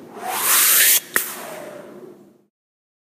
Mouth-swoosh-01
01.03.17: Happy new year, everyone. This is one of a couple of mouth swooshes I made for a papier-mâché style animation. Sweep up, small break, then a mouthy impact. Recorded with iPhone 6s, edited and processed in Logic.
air, airy, breath, breathy, effect, female, fun, human, male, mouth, natural, noise, sfx, slow, sound-effect, swish, swishes, vocal